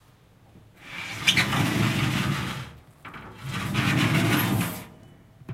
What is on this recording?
20141119 glassdoor H2nextXYWAV
Sound Description: Open and close a glass door of a cupboard
Recording Device: Zoom H2next with xy-capsule
Location: Universität zu Köln, Humanwissenschaftliche Fakultät,216 B 3.Stock
Lat: 6°55'13''O
Lon: 50°56'5''N
Recorded by: Vicky Jordan and edited by Nina Welsandt